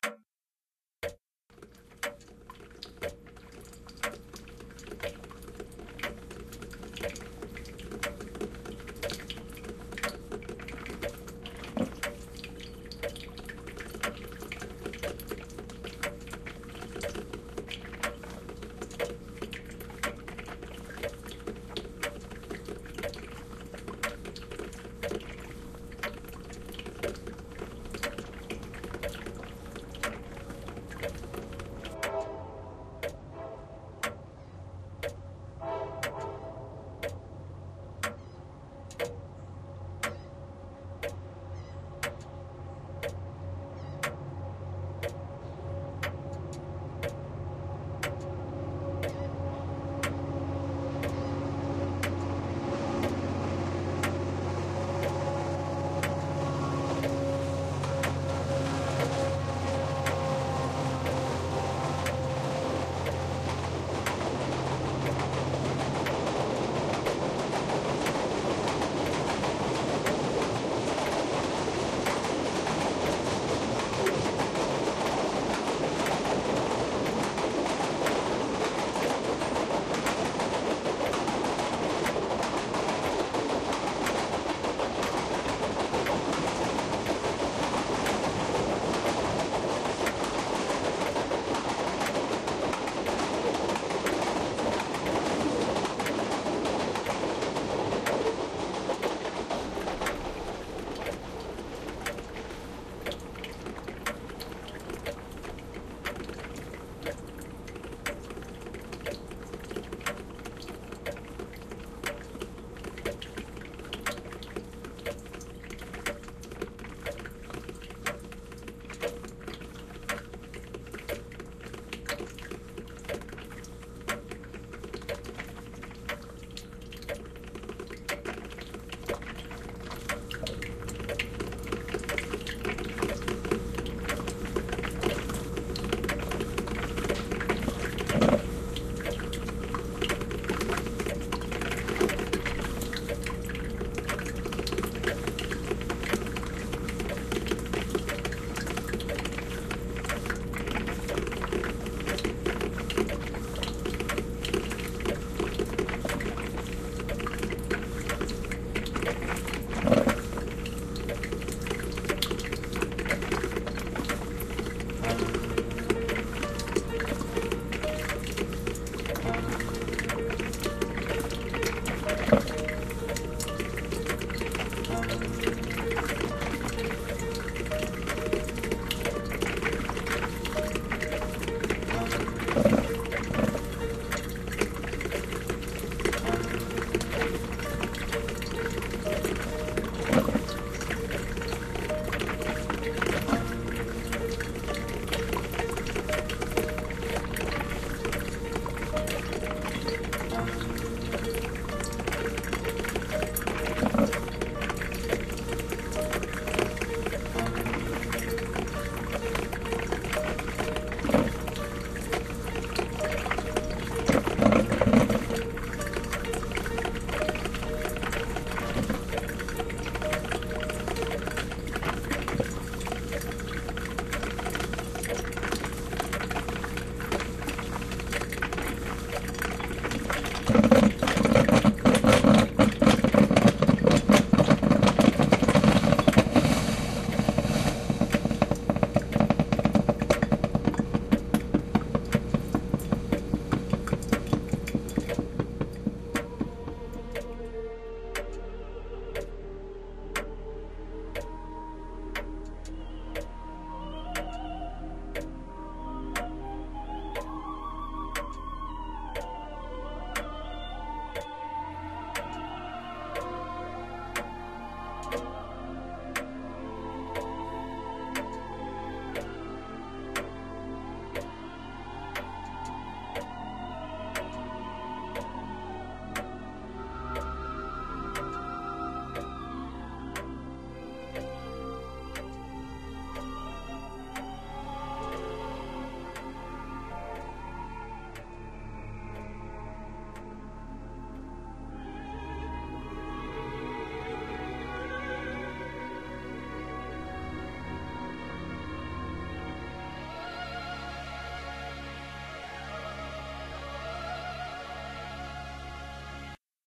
Soundscape-udkast2 Klaver
A soundscape of a quiet room with the coffeemaker going, a train passing, some practising piano next door and someone putting on an opera record at the end
piano, soundscape, passing, clock, time, train